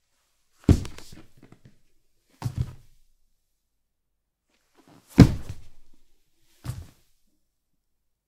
Sturz - aus dem Stand, 2x
Sound of a person falling from a standing position